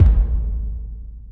Several bass drum sounds layered to create an awesome bass hit to emphasize breakdowns commonly found in hardcore/metalcore bands.